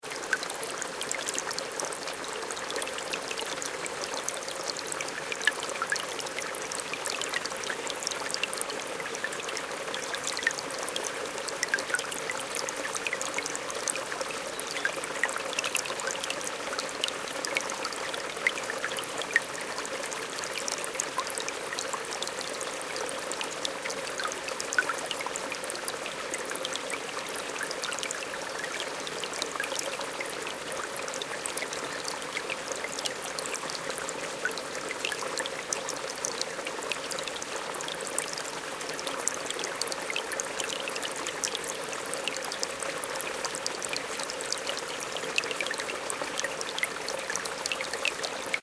Small river 3
This is a small river that crosses the hills near the town I live in. I recorded this standing real close to the water to catch even the tiniest drops.